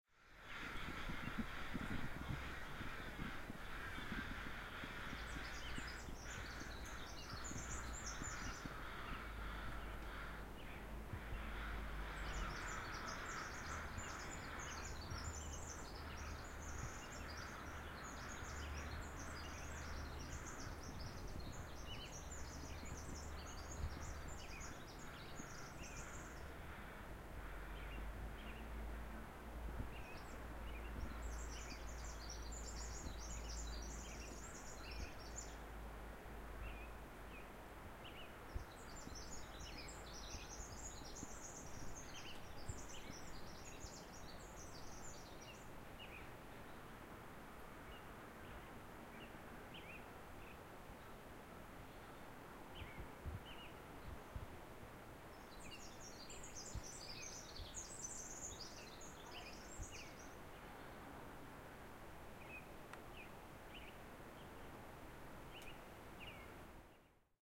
Songbirds and Crows 2
birds, crows, field-recording, morning